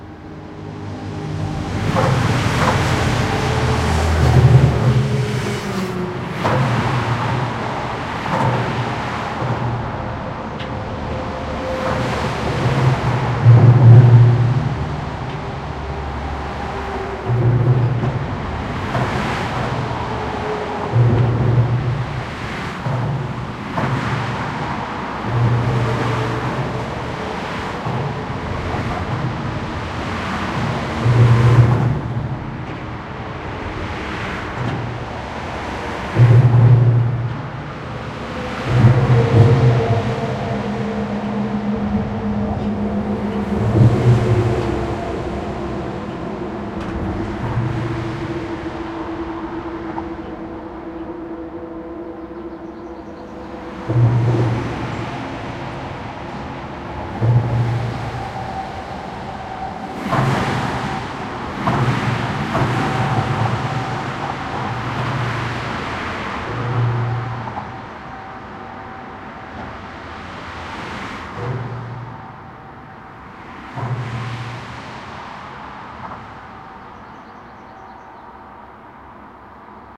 4ch field recording of an underpass below a German motorway, the A38 by Leipzig.
The recorder is located in the center of the underpass, angled up into a gap between the two lanes, affording a clear stereo picture of cars and trucks passing directly by the recorder, with the motorway noise reverberating in the underpass in the background.
Recorded with a Zoom H2 with a Rycote windscreen, mounted on a boom pole.
These are the REAR channels, mics set to 120° dispersion.